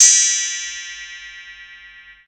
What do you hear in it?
Exotic Electronic Percussion46
electronic, exotic, percussion